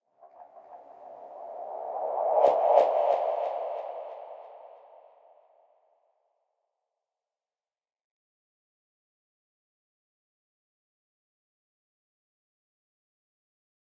Treated sound from a recording.